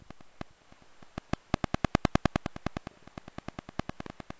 Colorino, Interference from Router
Colorino light probe and color detector picking up interference from the AT&T; router as I was holding it near it without pressing any buttons. The speaker was still active, it stays on for several seconds after you've pressed a button. Thus it was being front-end-overloaded by the router.
click, color-detector, lo-fi, noise, tick, wifi